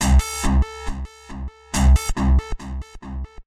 a weird guitar feedback sound i got
80s, guitar, old, palm, rhodes, school, screech